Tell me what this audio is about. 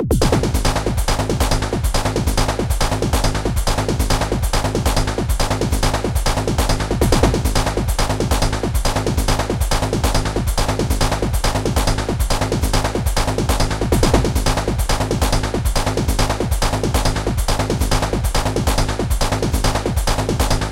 the beat 2
dance, beat, club